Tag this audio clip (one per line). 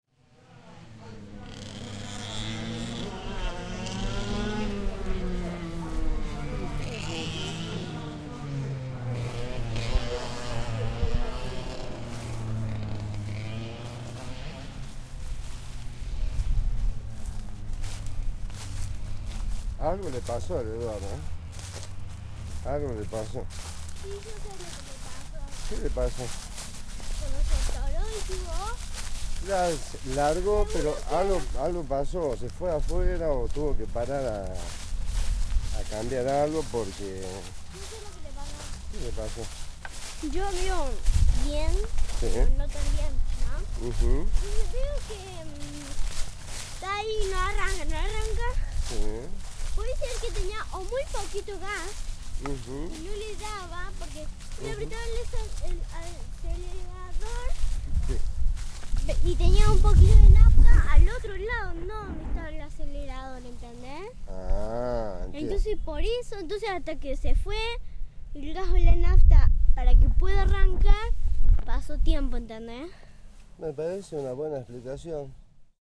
ambience
argentina
circuito-valentin-lauret
cordoba
engine
field-recording
girl
nature
noise
race
racing
sound
talking
voice
zoomh4